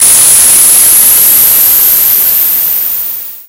large destruction02
game, video, war, military, bomb, explosive, destruction, games, boom, explosion, army, artillery